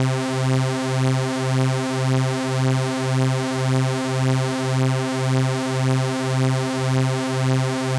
Detuned Sawtooths C2
Detuned sawtooths good to make bass sounds